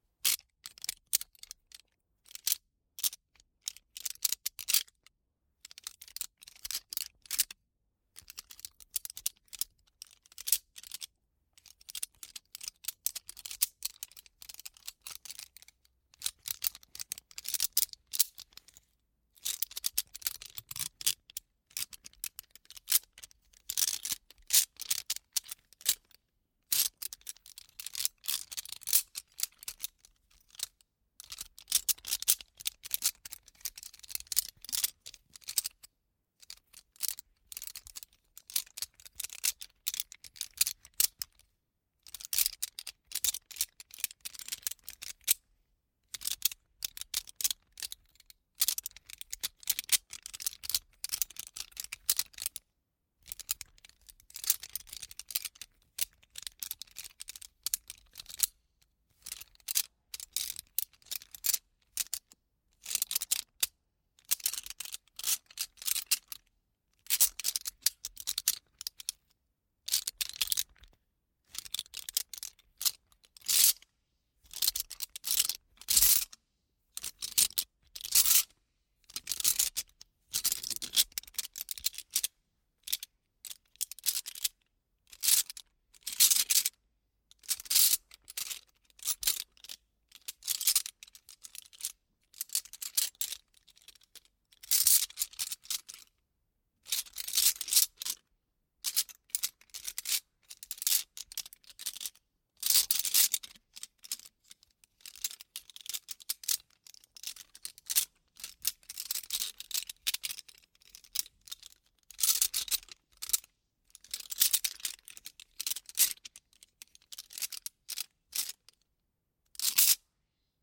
props cutlery subtle handling noises of bunch of forks mono 8040
This sound effect was recorded with high quality sound equipment and comes from a sound library called Props Box which is pack of 169 high quality audio files with a total length of 292 minutes. In this library you'll find different foley recordings.
effect, forks, fork, cutlery, foley, noise, props, sounddesign, handle, sound, tape, prop, metal, design, handling, duct